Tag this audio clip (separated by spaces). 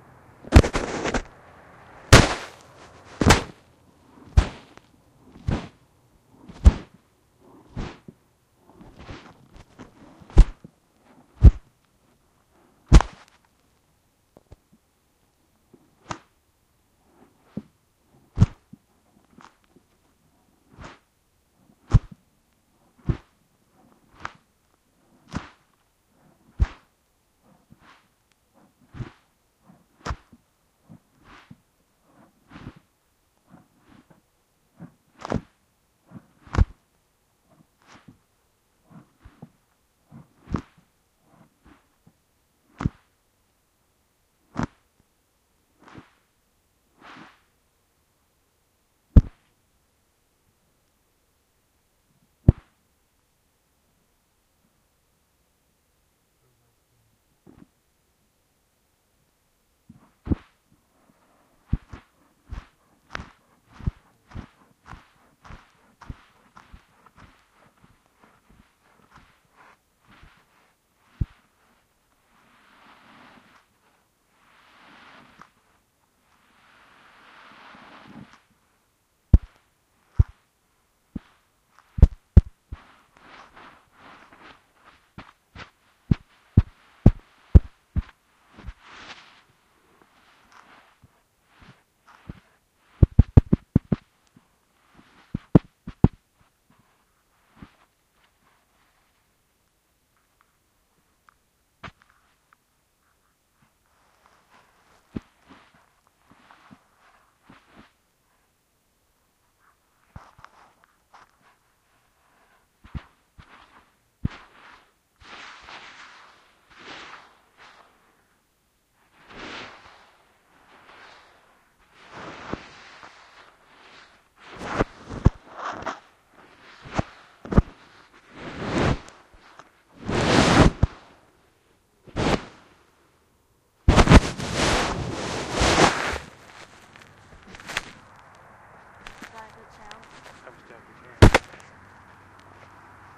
sand hydrophone